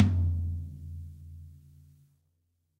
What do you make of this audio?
Middle Tom Of God Wet 003
tom, kit, drumset, drum, set, middle, realistic, pack